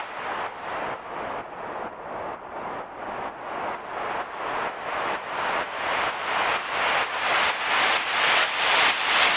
A simple 3 osc noise buildup preset that I made.
Effects: reverb,side-chaining
BPM: 128